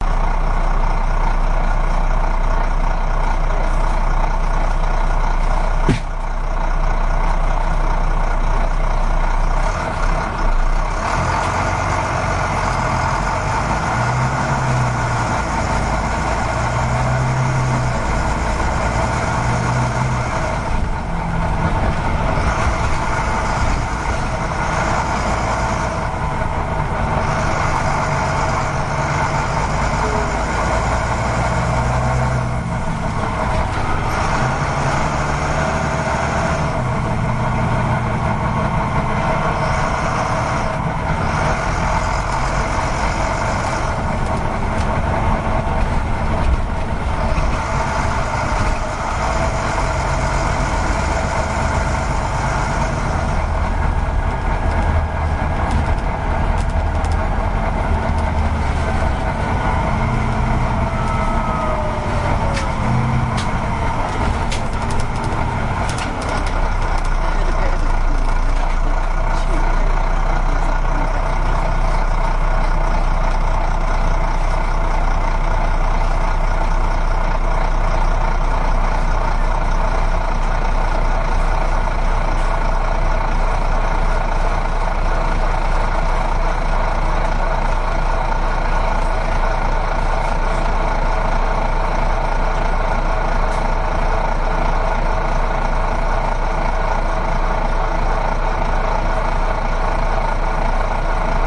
chugging diesel (bus) and rev
A general heavy engine chugging forward (recorded at the back of a bus)
vehicle, engine, tank, drive, truck, bus, motor